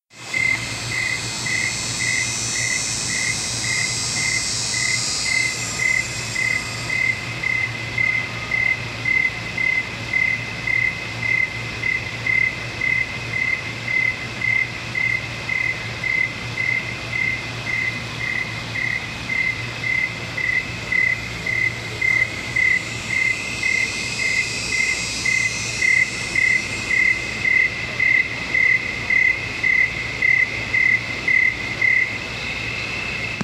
swamp noises recorded at Walt Disney World near the Wilderness lodge, including cicadas and buzzing of crickets over a dike